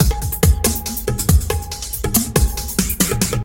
70 bpm drum loop made with Hydrogen
beat, electronic